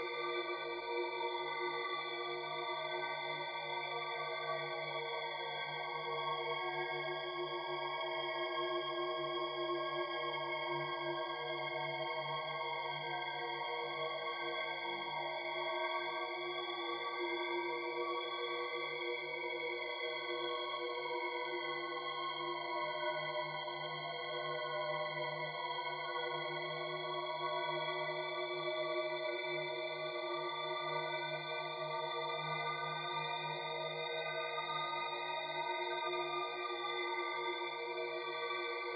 Synthetic ambiance reminiscent of planetary weirdness sounds from Star Trek, though it is not intended to emulate those. I can imagine this being used as just one component (drone) of any other-worldly situation. Just add the sonic sprinkles of your choice. All components of this sample were created mathematically in Cool Edit Pro.
ambient, background, sci-fi, loop, fantasy, horror, eerie